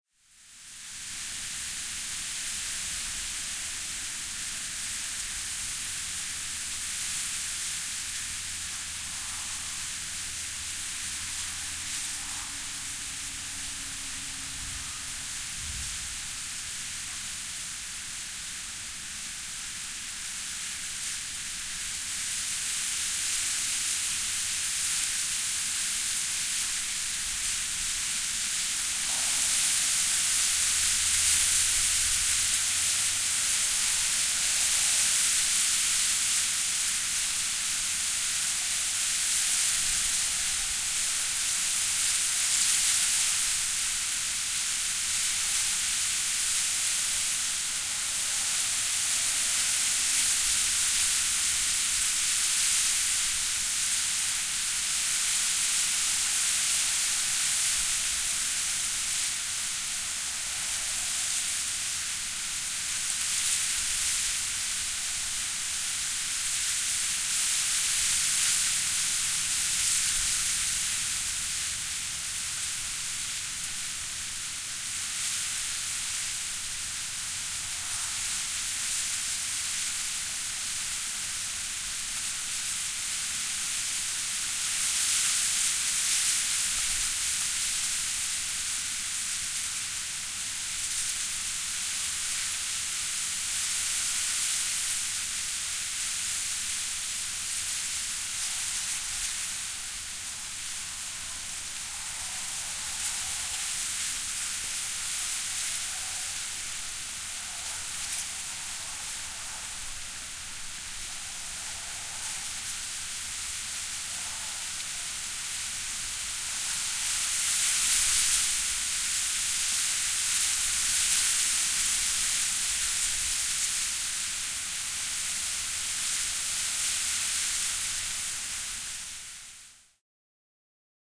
Kaislikko suhisee tuulessa / Reeds, bulrushes, common reeds, rustle and whiz in a mild wind
Kaislat, järviruo'ot, suhisevat ja rapisevat heikossa tuulessa.
Paikka/Place: Suomi / Finland / Vihti, Vanjärvi
Aika/Date: 18.10.1994
Whiz, Suomi, Rapista, Tuuli, Rustle, Reeds, Soundfx, Yleisradio, Kaislat, Suhista, Bulrushes, Wind, Finnish-Broadcasting-Company, Nature, Tehosteet, Finland, Luonto, Kaislikko, Field-recording, Yle